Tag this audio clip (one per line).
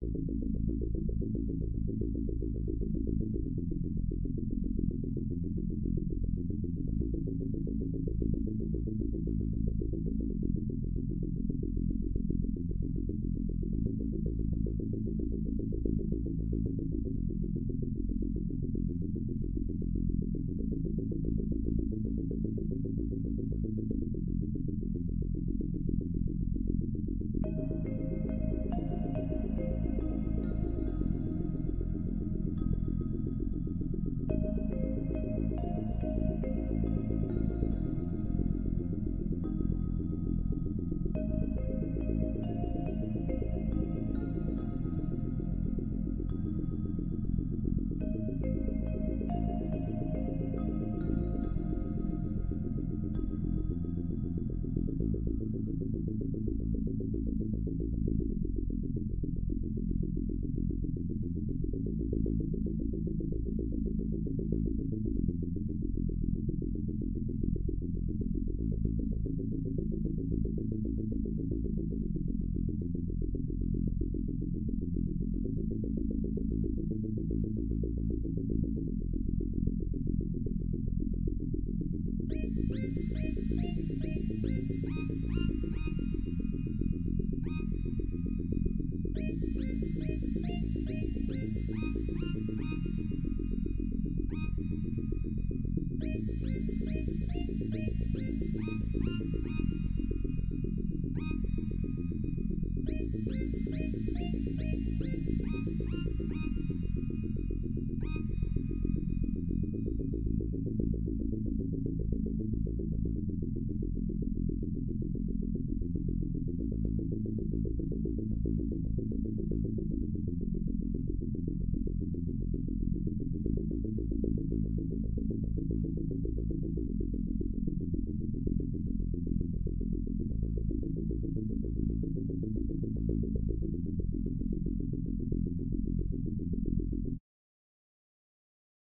movie
halloween
ghost
insane
scary
horror
fear
theme
video-game
strange
killer
psycho
creepy